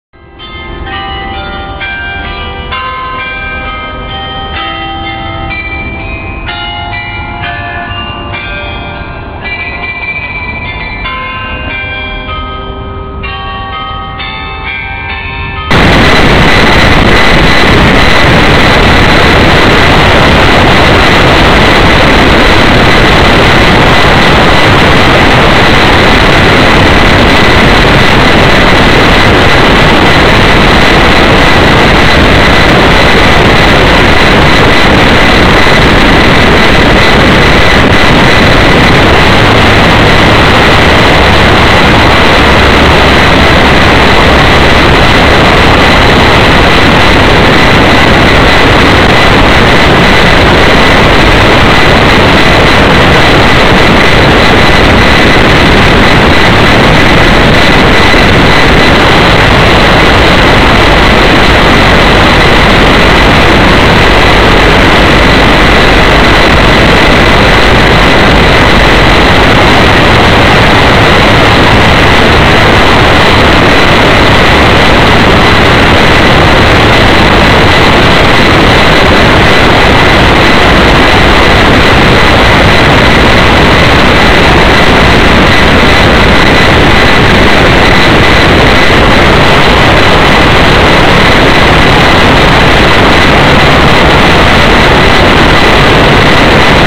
This is a ringing of an old clock at a church tower next to Louvre, in France. It doesn't ring single bells, but performs a melody.